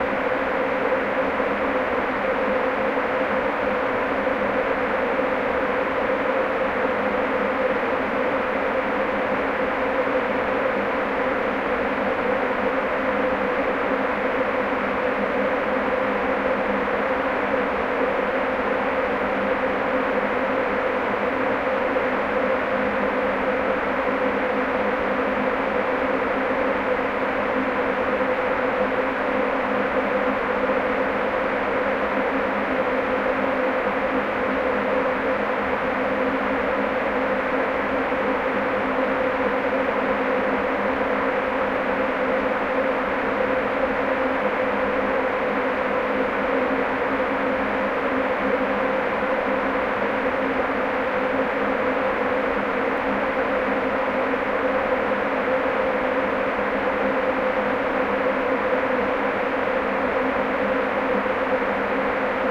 Gothic Ambient Noise 1 Stereo C3
Stereo gothic ambient noise recorded with analog synthesizer.
synth, ambient, atmosphere, thrill